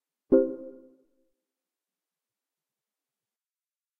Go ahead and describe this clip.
Simple "success" sound, C chord in synth.
beep, chord, game, success, synth